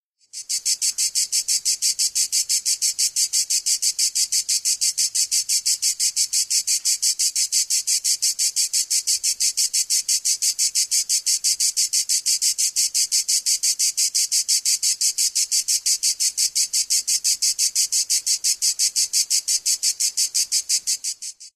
Cicada on tree, recorded in Corsica